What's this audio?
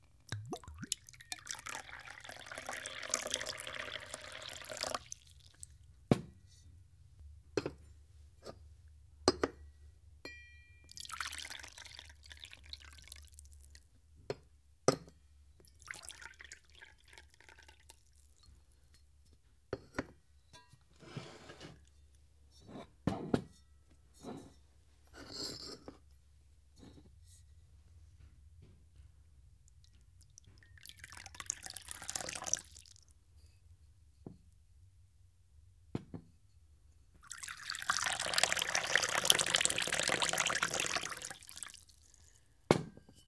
Indoor Wine Glasses Pour Water
Water (or wine) being poured into wine glasses.
effect, foley, glass, indoor, kitchen, sfx, sound, sounddesign, utensils, water, wine